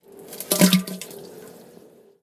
Testing my iPhone 6 Plus's (mono) microphone with Voice Memos. Pooping in a toilet. Disgusting. I am gross, ain't I?